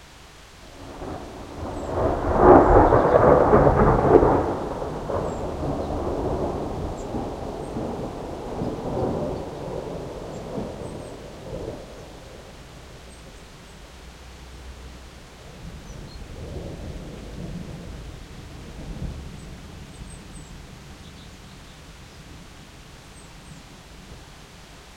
One of the thunderclaps during a thunderstorm that passed Amsterdam in the morning of the 9Th of July 2007. Recorded with an Edirol-cs15 mic. on my balcony plugged into an Edirol R09.
field-recording, nature, thunder, streetnoise, rain, thunderstorm, thunderclap